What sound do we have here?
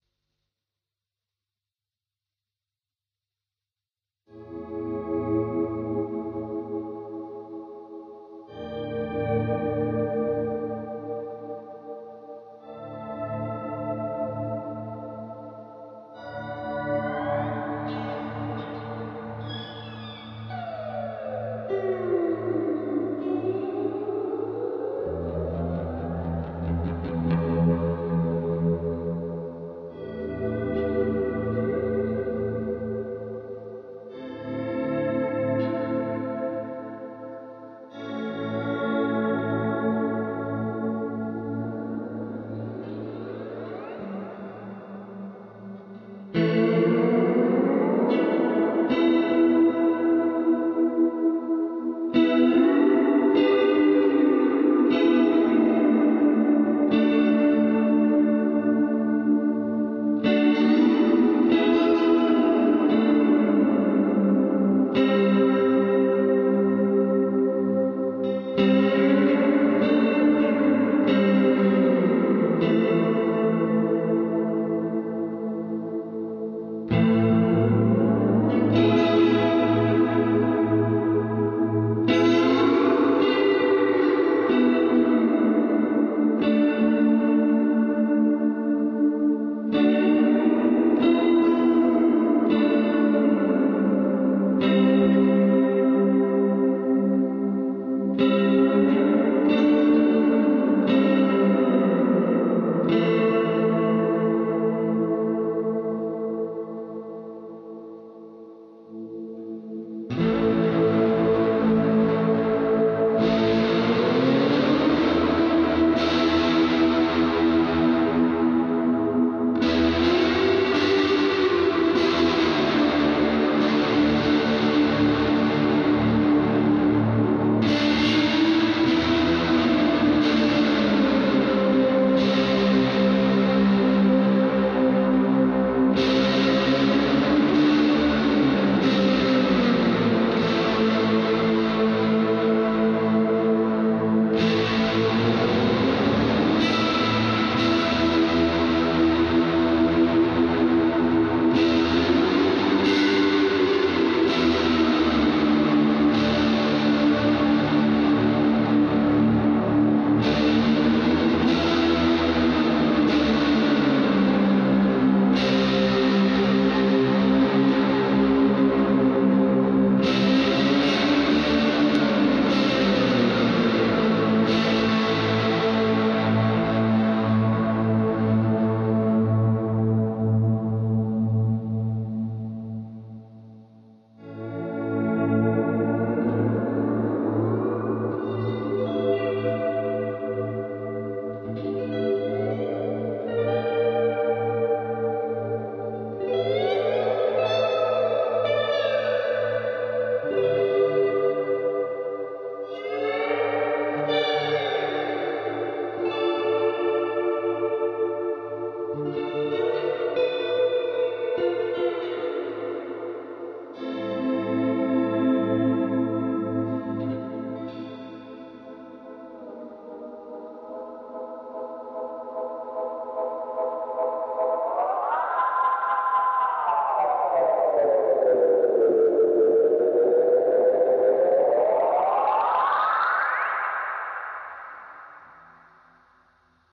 Space music, using delay, reverberation, Chorus and drive. Played by slide.
psychedelic, Atmospheric, Atmosphere, Cosmo, Guitar, Echo, Experimental, Elektric, Space, Noise, Music, Delay, Reverb